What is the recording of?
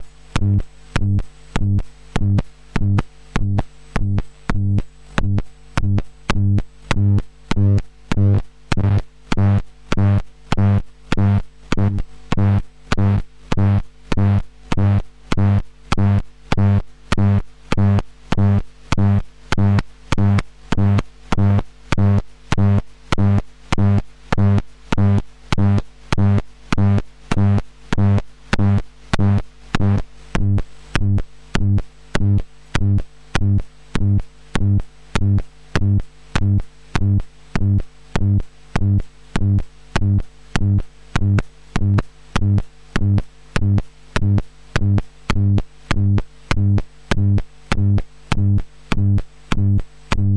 EM magnetic valve03
electromagnetic scan of a magnetic valve opening and closing fast with changing distortion and rhythmic hick-ups. sounds a lot like synthesized sound.
electromagnetc, valve, electronic, pulse, scan, synthesizer